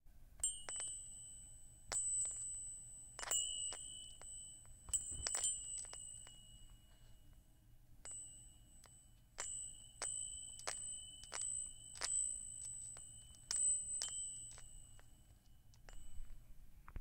Bell Ring.R
The sound of a bell ringing
Bell, ring, sound-effect